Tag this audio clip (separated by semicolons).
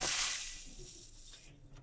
liquid; cooking; cigarette; water; sizzle; fizzle; simmer; crackle